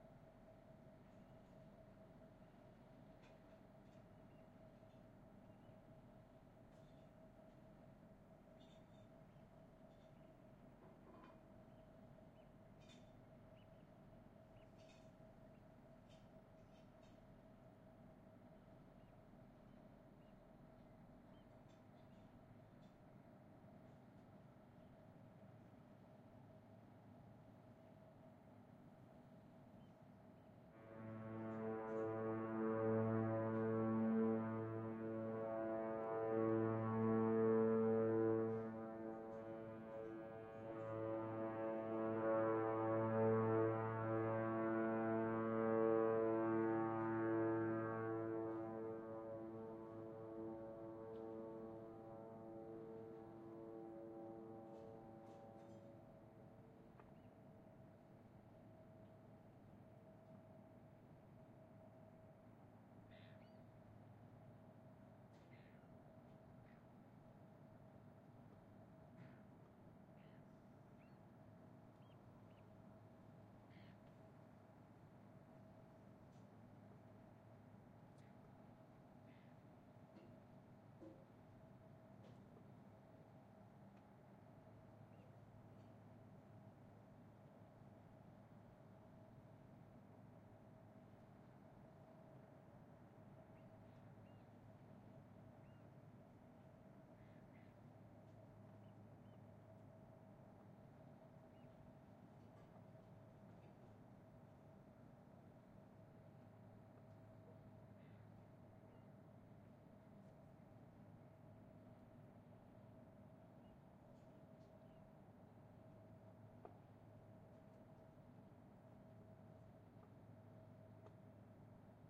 Sounds of a ships fog-horn in the distance. This is part of a 3 recording set. The ship made the same sound three times as it came up a harbour. This recording was made at night. Temperature 5 degrees C. The horn reverberates off the surrounding hills.